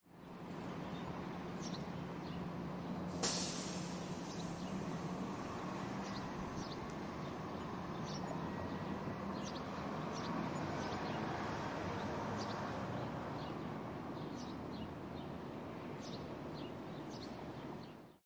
Buses leaving a city bus station and the sound of air brakes on a breezy day